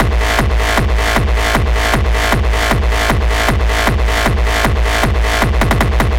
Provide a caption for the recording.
xKicks - We Satan
There are plenty of new xKicks still sitting on my computer here… and i mean tens of thousands of now-HQ distorted kicks just waiting to be released for free.
kick, techno, kickdrum, beat, hard, drum, hardstyle, distorted, bass, gabber, hardcore, bass-drum, distortion, bassdrum